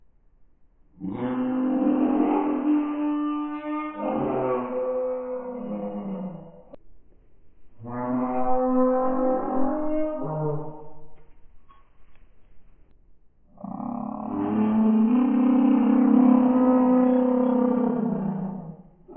Create Khủng Long 18 from SiêuÂmThanh's sound 'Con Ngỗng Và Con Vịt' use Audacity:
• Copy from 4.857s to 6.109s
• Copy from 57.990s to 59.011s
• Copy from 40.626s to 41.878s
• File→New
• Paste all sample
• Effect→Change Speed…
- Speed Multiplier: 0.2
growl, dragon, dinosaur, monster, beast, creature, animal